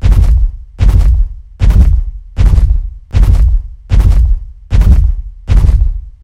monster galloping
a big giant creature galloping